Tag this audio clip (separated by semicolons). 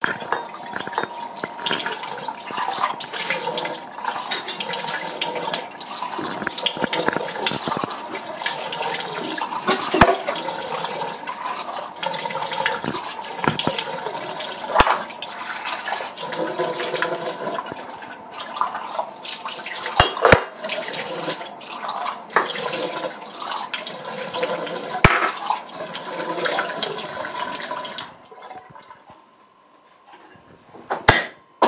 dribble; kitchen; running; sink; stream; trickle; water